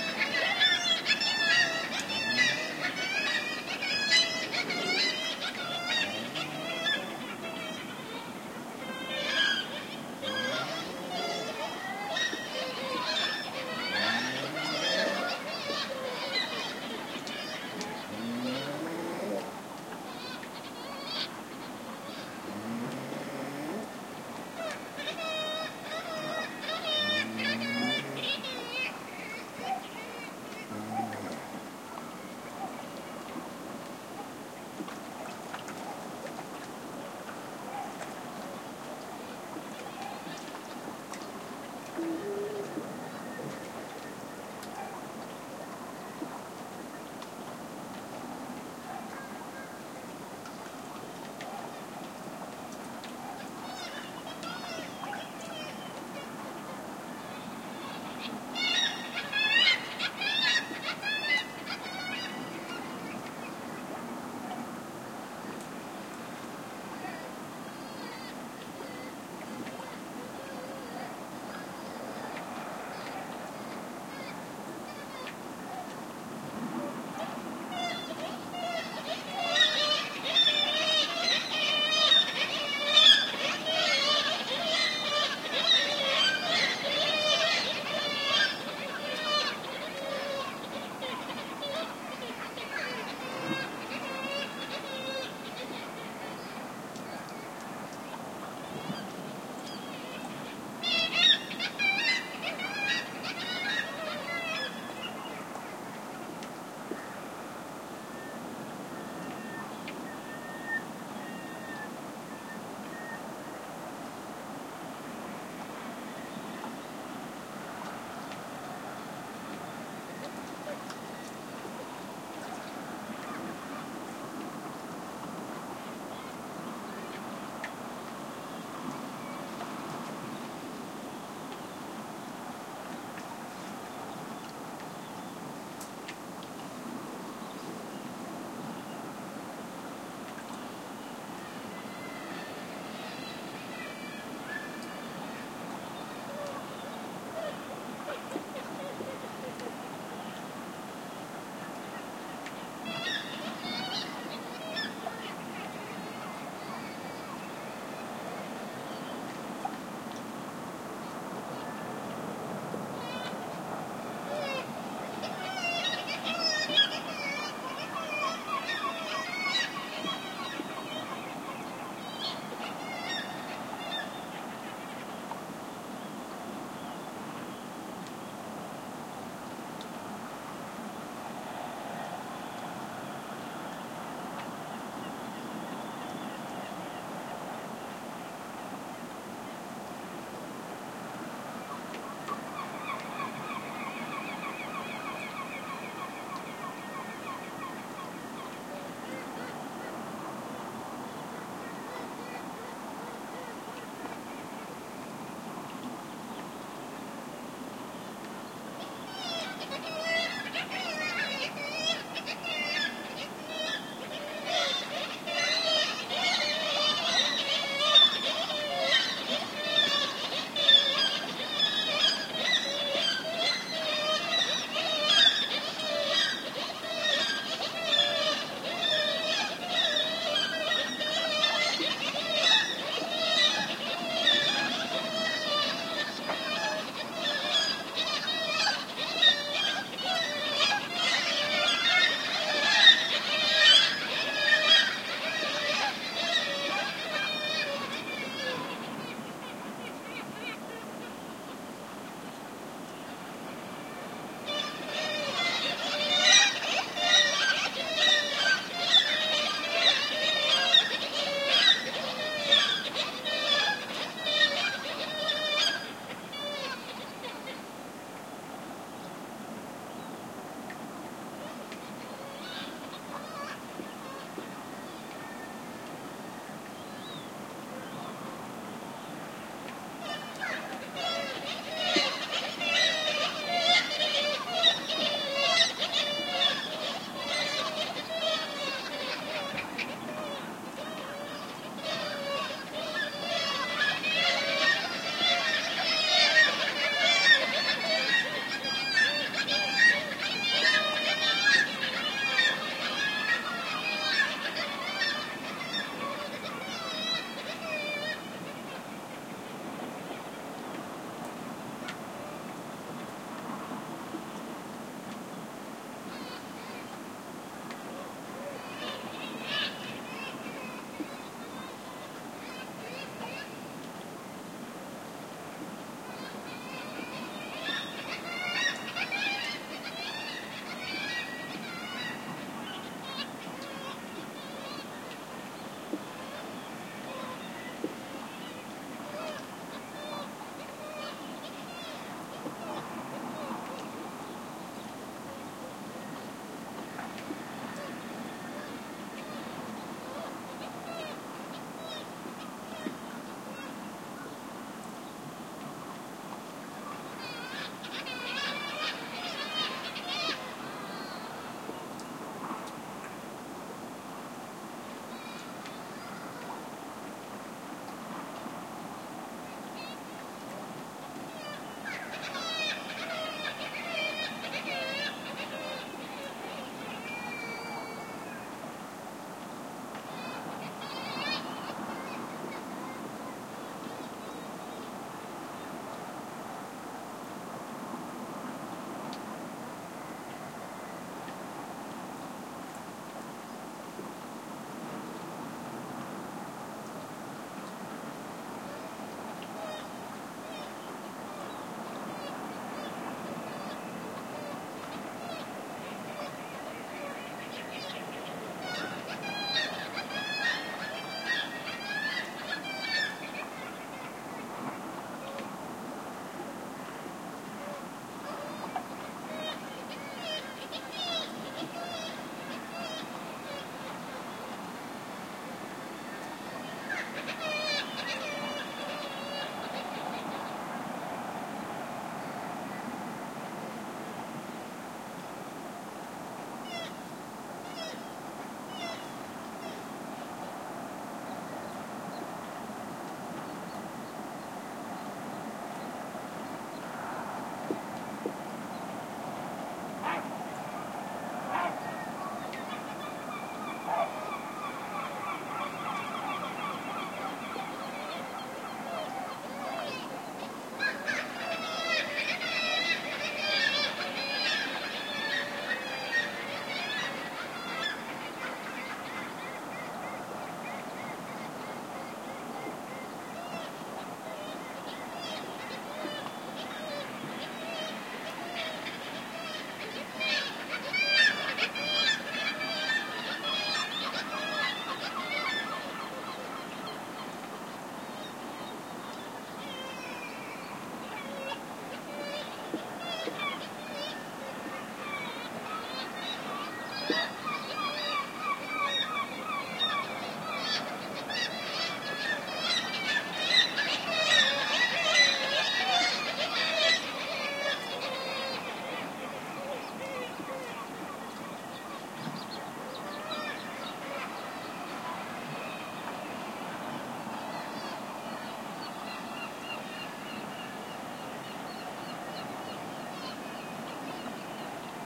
Longish nocturnal take of seagull screeching calls, recorded at Andenes, Norway. Primo EM172 capsules inside widscreens, FEL Microphone Amplifier BMA2, PCM-M10 recorder